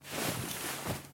Rustling Duvet 6
Recording of me rustling a duvet.
Low frequency thumping and high-mid frequency fabric rustling.
Recorded with a Zoom H4N Pro field recorder.
Corrective Eq performed.
This could also be used to portray a heavy cloak being rustled.
duvet, rustling, cloak, rustle, home, bed, bedding, rustling-duvet, fabric, bedroom, domestic